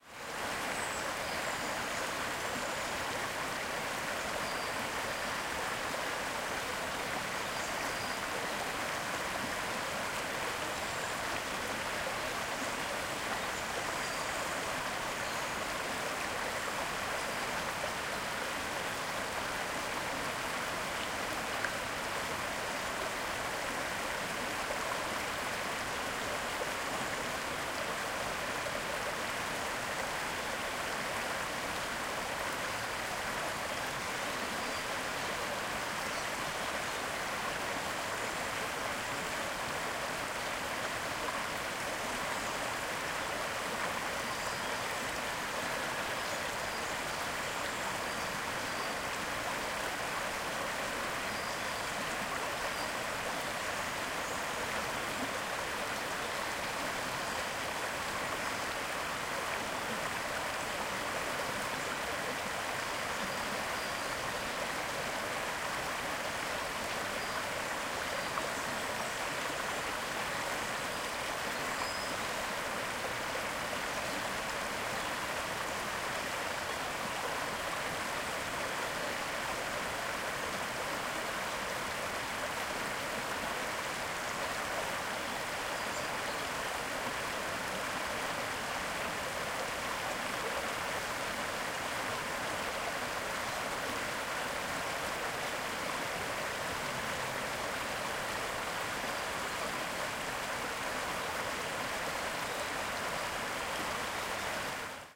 LS 33475 PH WaterStream
Water stream in the forest.
Irecorded this audio file in a plaisant forest on Tablas island (Romblon, Philippines). You can hear a water stream and the birds singing in the trees around.
Recorded in November 2016 with an Olympus LS-3 (internal microphones, TRESMIC ON).
Fade in/out and high pass filter 160Hz -6dB/oct applied in Audacity.